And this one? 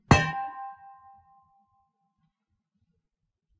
Metal Thud
Heavy metal water bottle dropped on carpeting with ring off.
Thud, Metal, Ring, Clang, Drop, Carpet, Boom